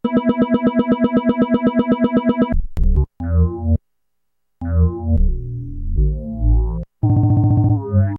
Mind Ambient 9
This is the Nord Lead 2, It's my new baby synth, other than the Micron this thing Spits out mad B.O.C. and Cex like strings and tones, these are some MIDI rythms made in FL 8 Beta.
nord, ambient